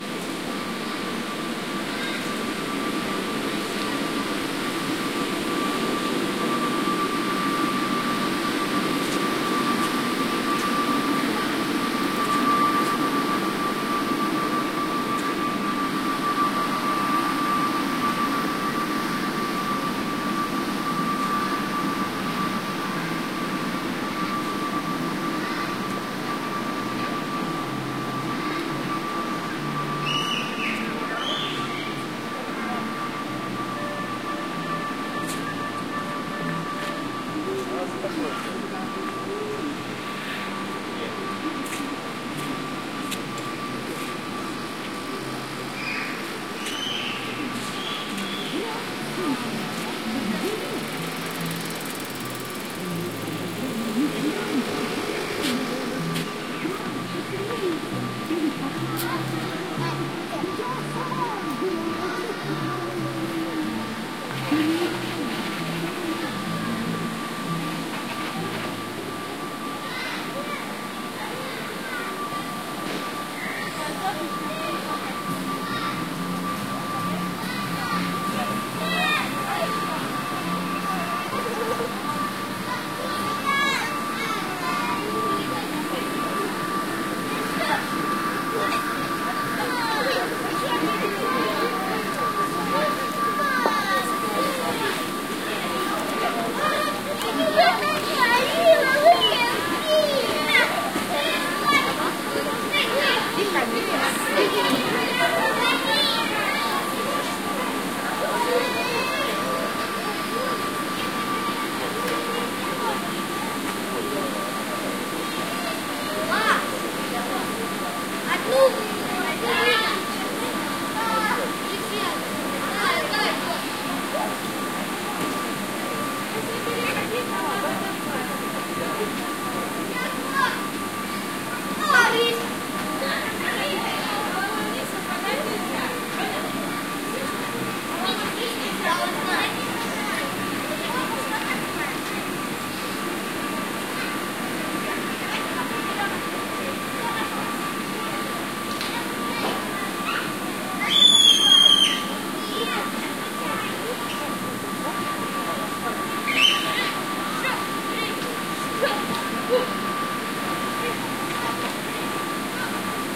надувные объекты
Recorded 27.08.2015 childrens playing
attraction, child, children, field-recording, happy, kid, laugh, laughing, peaceful, playing, russia